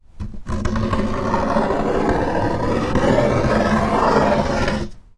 Box 25x25x7 thin ROAR 009
The box was about 35cm x 25cm x 7cm and made of thin corrugated cardboard.
These sounds were made by scrapping the the box with my nail.
They sound to me like a roar.
nail, scrape